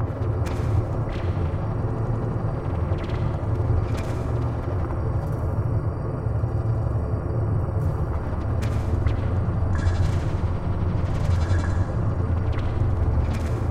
Viral Vintage Firefly
Another granualized layer of stretched synths and cello's